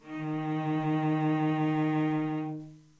4 cello D#3 Eb3
A real cello playing the note, D#3 or Eb3 (3rd octave on a keyboard). Fourth note in a C chromatic scale. All notes in the scale are available in this pack. Notes played by a real cello can be used in editing software to make your own music.
There are some rattles and background noise. I'm still trying to work out how to get the best recording sound quality.
cello, D-sharp, Eb, E-flat, instrument, scale, string, stringed-instrument, violoncello